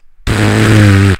Here is my sarcastic fart sound, made with my mouth not the other end, I promise! lol. Hopefully someone can put it to use!
nonsense, error, funny, fart, poot, farting, sarcasm, bad, wrong